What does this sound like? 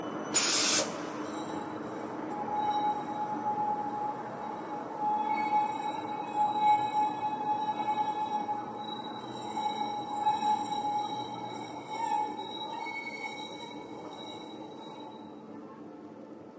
noise of tram marching, starts with a short puff then a long screech
screeching, railway, tramway, city, field-recording